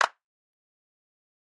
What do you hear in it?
Some simple claps I recorded with an SM7B. Raw and fairly unedited. (Some gain compression used to boost the low-mid frequencies.)Great for layering on top of each other! -EG
percussion, clap, simple-claps, hand, simple-clap, hand-claps, sample, claps, simple